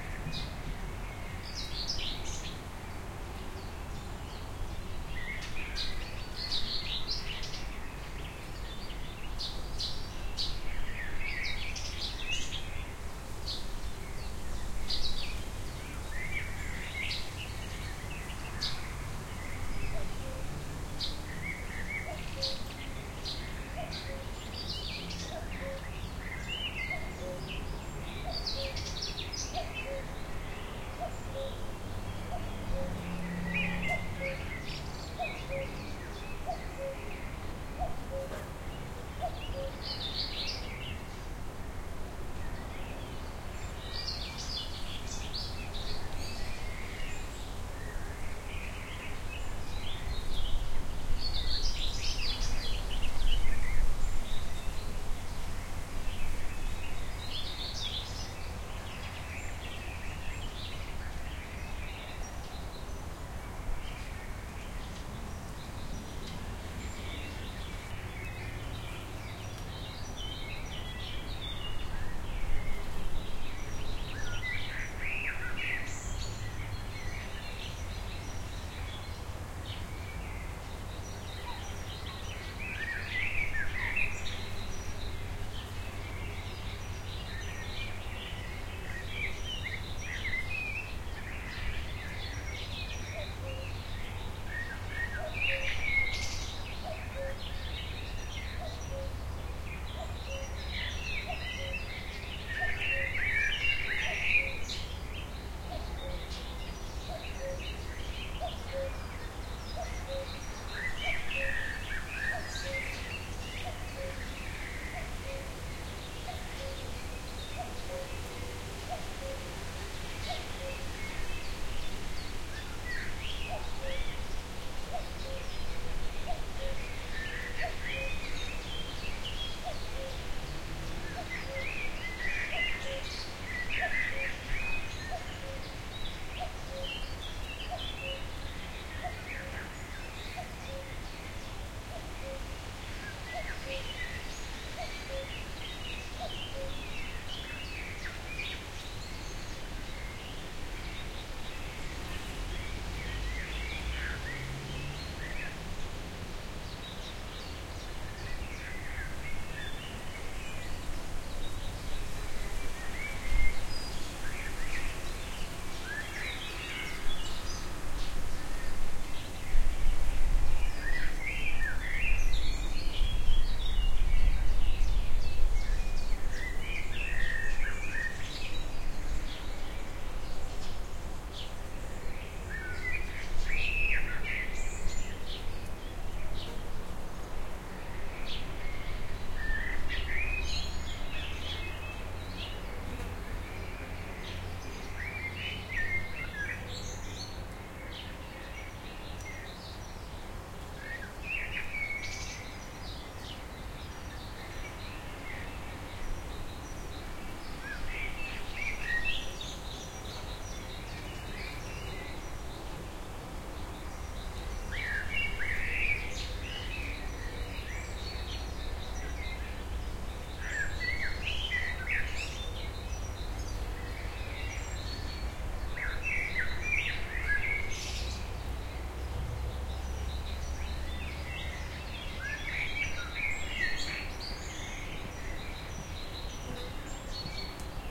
danish garden
Few moments of a windy afternoon in May 2009, recorded in a garden in Esbjerg. Not much traffic.
AT3031 microphones, Shure FP-24 preamp into R-09HR.
birds, wind, denmark, field-recording